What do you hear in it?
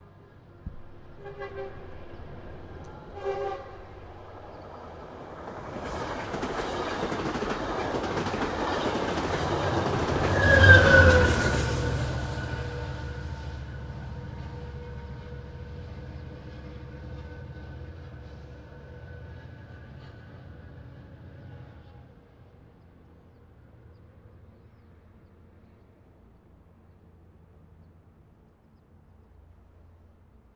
Train Passby Woosh Tracks Los Angeles
engine, train, wheels